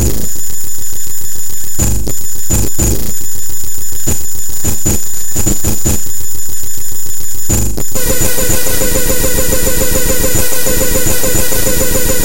more fun noise!